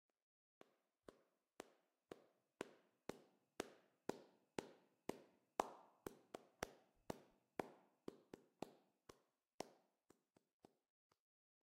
Me clapping my hands.